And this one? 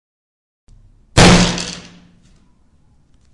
Hit Table 01
Someone must be very nervous and cashed at the table
HitTable, Fury